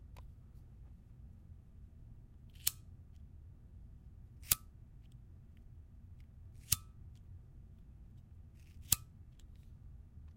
Lighter Flick
Flick my bic.
bic, lighter, my